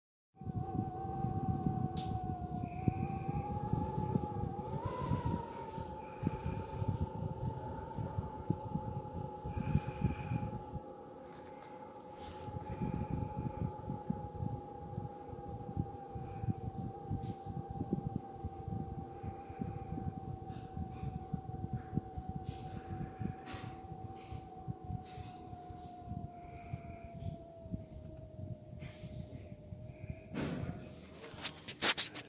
Wind howl minor
A minor howling wind.
Have a great day!
blow, blowing, howl, Wind